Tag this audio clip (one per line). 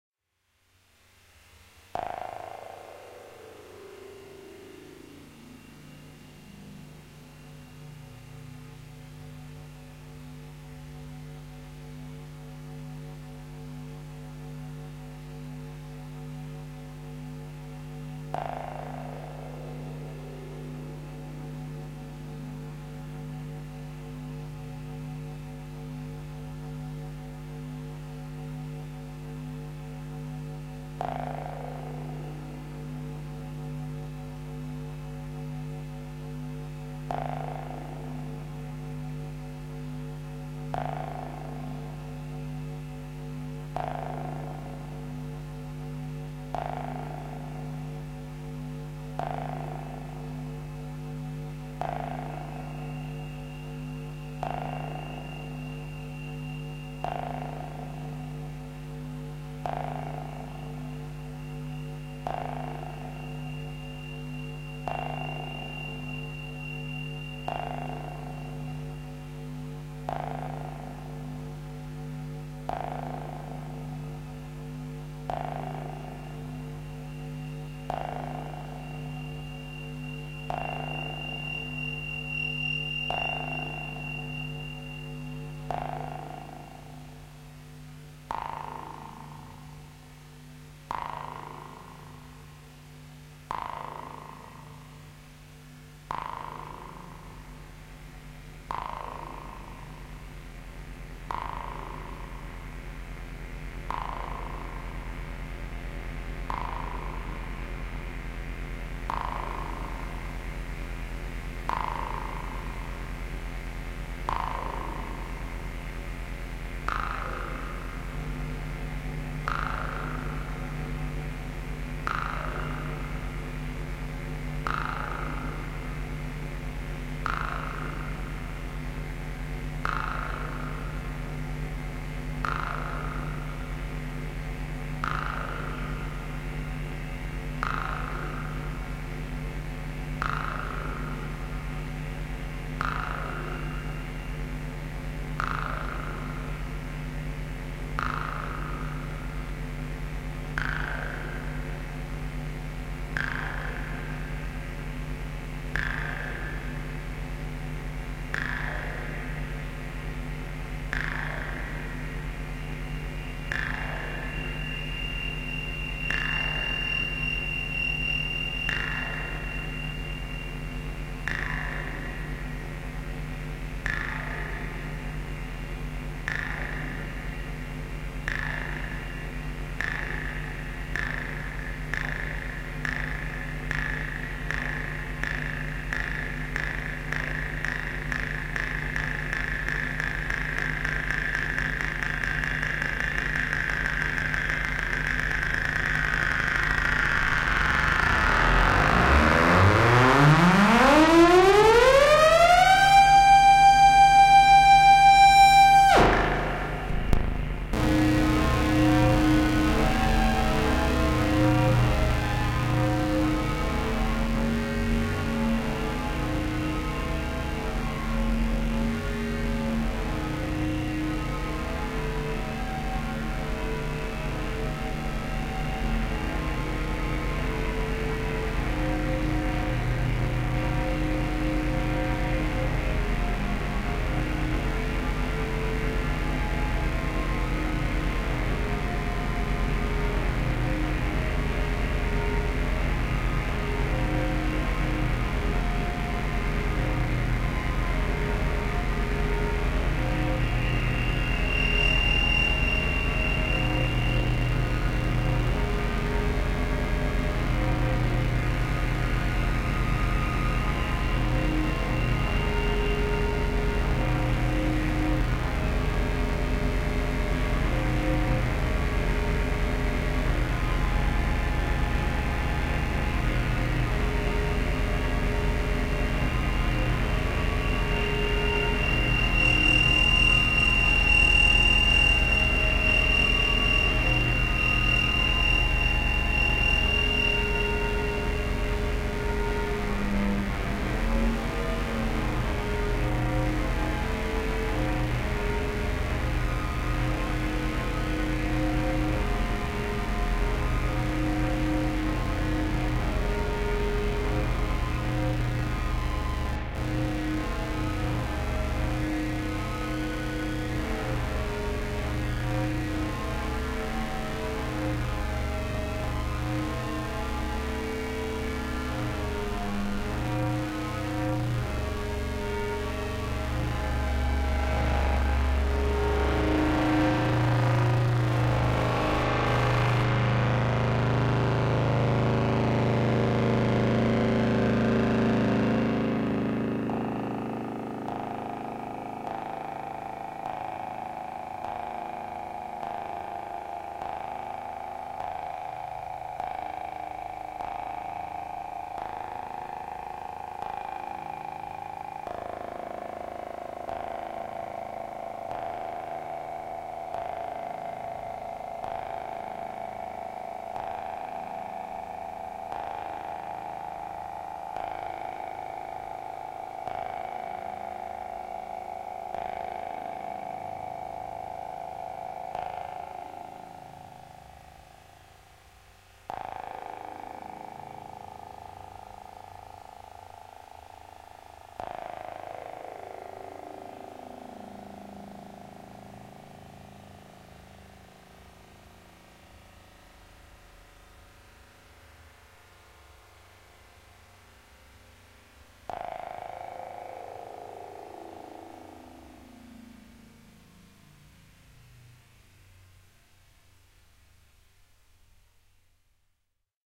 Ambiance
Free
Horror
Ambient
Cinematic
suspenseful
Ambience
Movie
Atmosphere
Dark
Drone
Film
Spooky